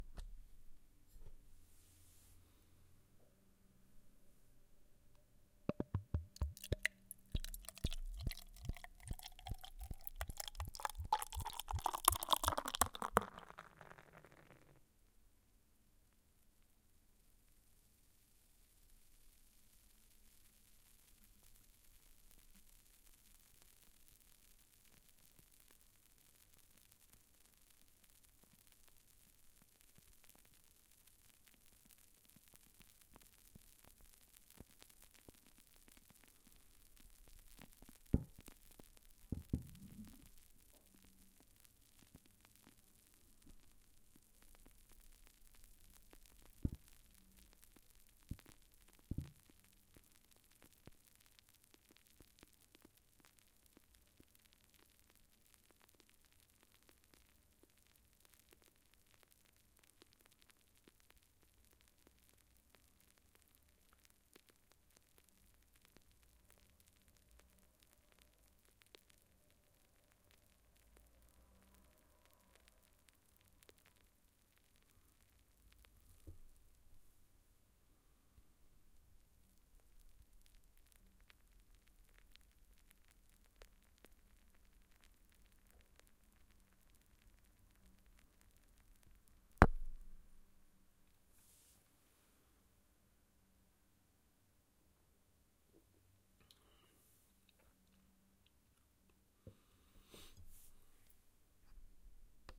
Pouring beer in glass
pour, ale